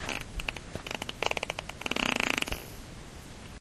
grumbly old fart
fart poot gas flatulence flatulation explosion noise